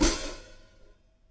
closed hat 1
Individual percussive hits recorded live from my Tama Drum Kit
Kit, recorded